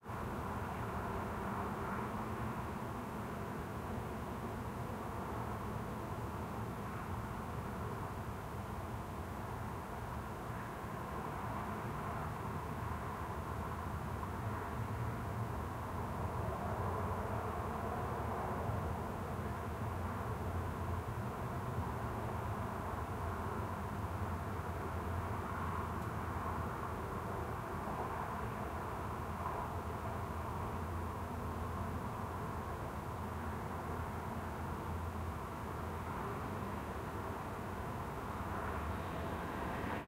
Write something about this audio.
City Ambience At Night 3
Recording of city ambience from my apartment window at night.
Processing: Gain-staging and soft high and low frequency filtering. No EQ boost or cuts anywhere else.
ambiance, ambience, ambient, atmosphere, background, background-sound, cars, city, field-recording, general-noise, night, noise, passing-by, soundscape, street, traffic